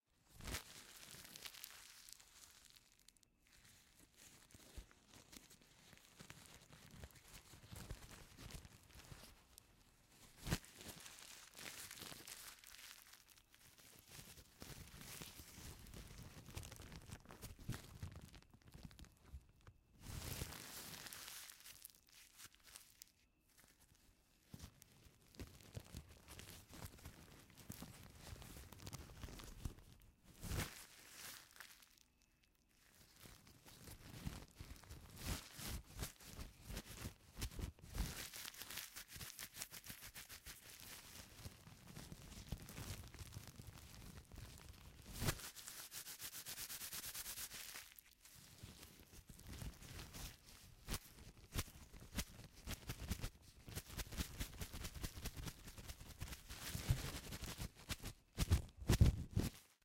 maryam sounds 8
This is another recording of wax paper being crumpled/uncrumpled, however, in this one, the progression of sound is a bit more sudden, as the paper is now being handled in an almost percussive motion.
amateur, MTC500-M002-s14, noise, sound, wax-paper-crumpling